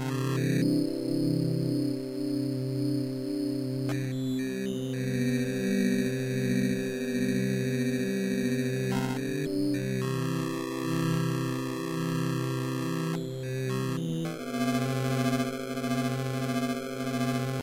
A very digital sounding sequence using vocal filters from a Nord Modular synth.